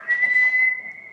Sound of person whistling in hallway, recorded with the built in microphone of a Mac computer
f13, FND112, Whistle, Whistling